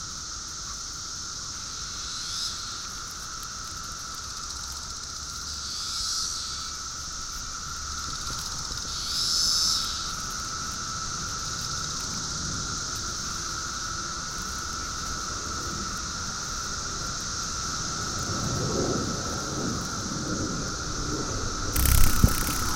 single cicada recorded w condenser
forest, bug, field, cicada